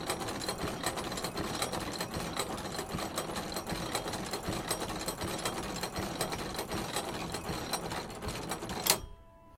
Running Gear
This is a song produced by an astronomical clock. That thing was full of complex mechanism including gears.
This is a song which is produced at the end of a cycle.
Hope you can do something with it.
gear, metal, tools